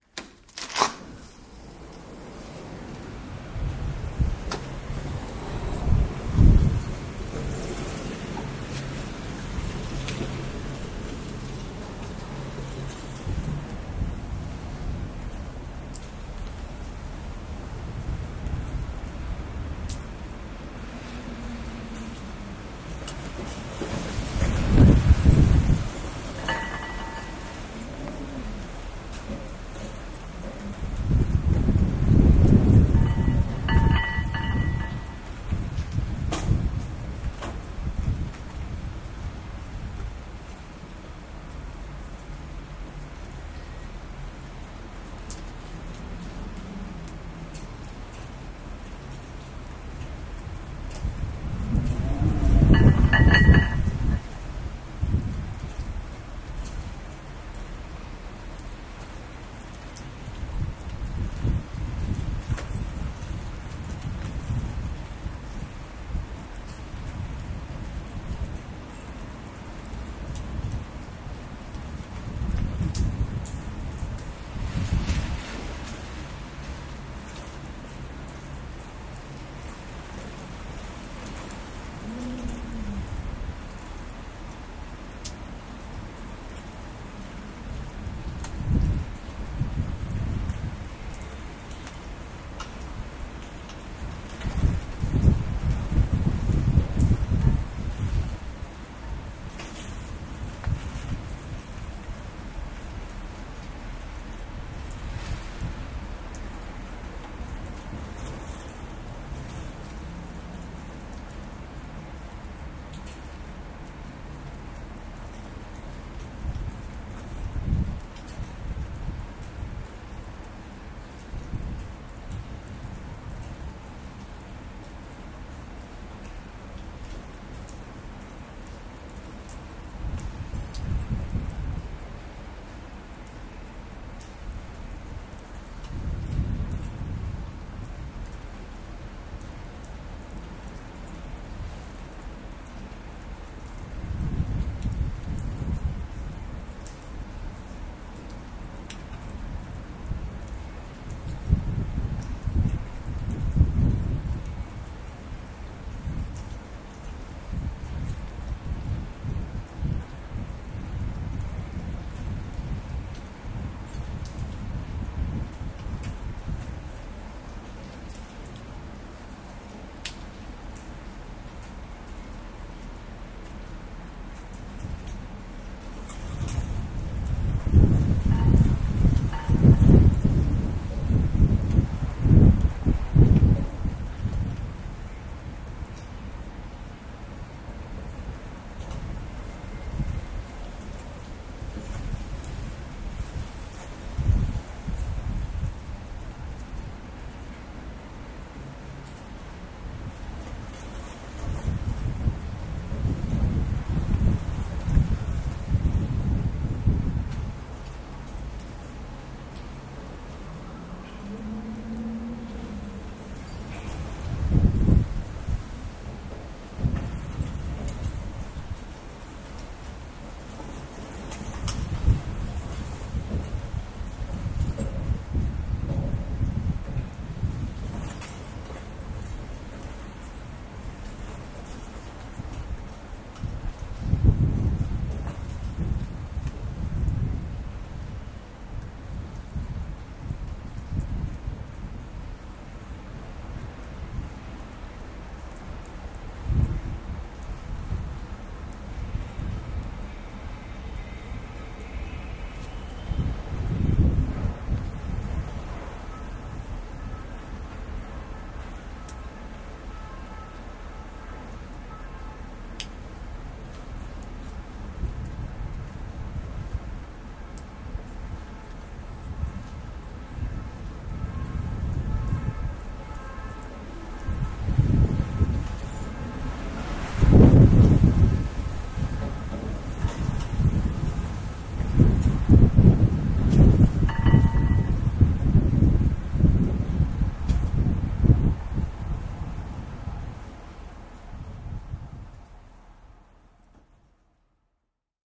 Amic del vent
Recording made in a windy night in Barcelona.